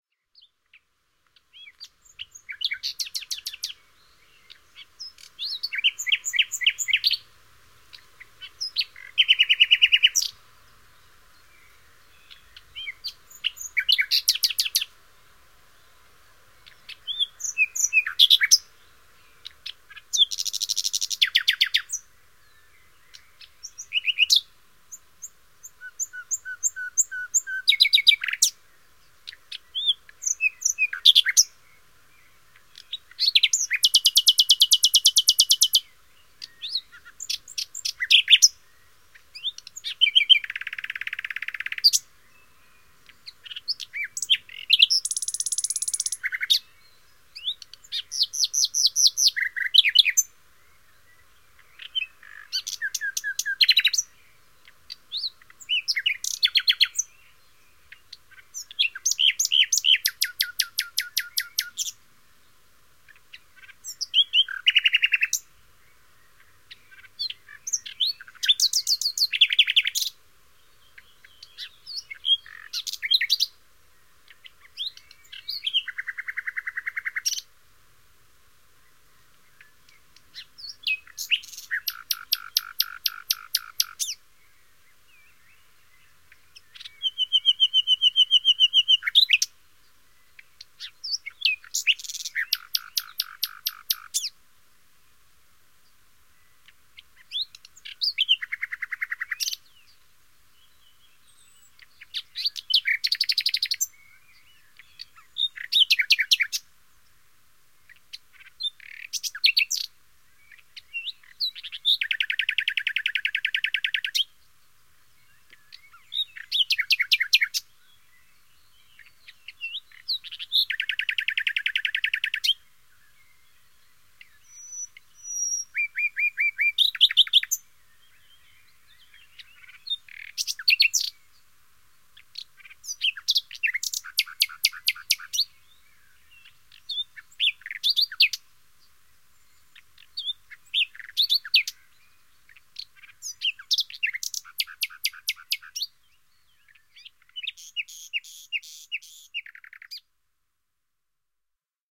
Nightingale song 2
Recorded at the same place as Nightingale song one ( forest north ofCologne, Germany), just one year later, in May 2003. In the background onecan hear the typical bird chorus of an early spring evening. Vivanco EM35 with preamp into Sony DAT-recorder.
field-recording, nightingale, bird, rossignol, spring, nature, usignolo, birdsong, rossinyol, forest, nachtegaal